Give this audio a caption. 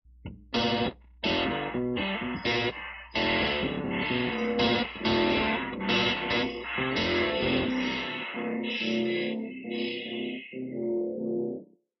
Guitar tracked thru multiple fx recorded in logic 8 with a sm 57